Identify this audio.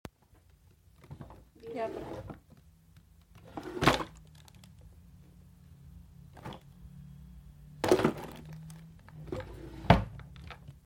Closing a drawer
A drawer being close, shooted at my room.
Drawer, Close